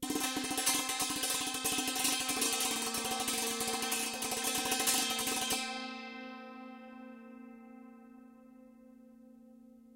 sant-roll-C4
recordings of an indian santoor, especially rolls plaid on single notes; pitch is indicated in file name, recorded using multiple K&K; contact microphones
acoustic, santoor, percussion, pitched, roll